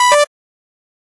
Right Ball 1
Sounds from a small flash game that I made sounds for.